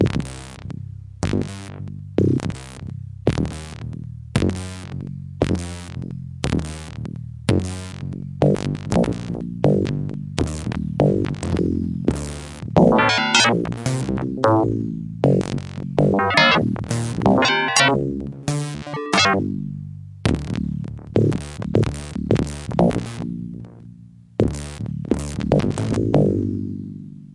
Yamaha PSS-370 - Sounds Row 3 - 23
Recordings of a Yamaha PSS-370 keyboard with built-in FM-synthesizer